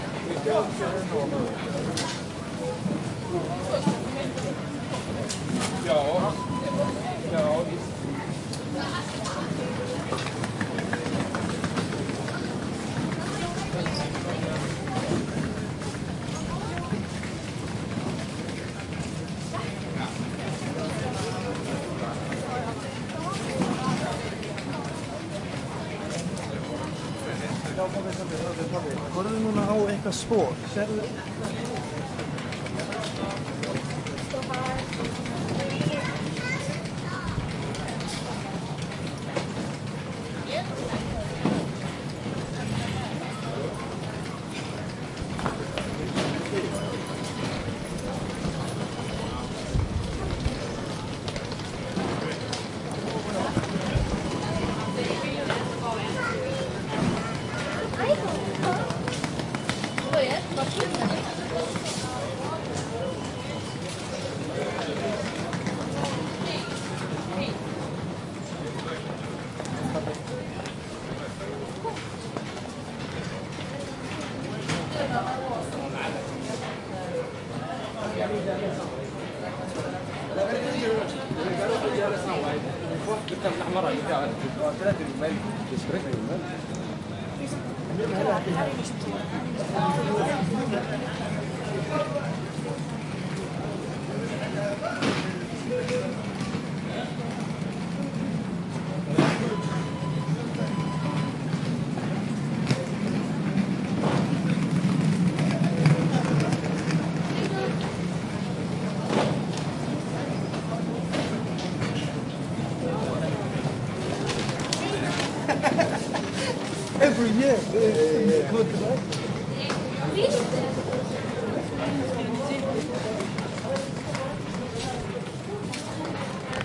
Stockholm Central 1
First recording inside Stockholm Centralstation hall. Sounds of rolling bags, footsteps, talking people.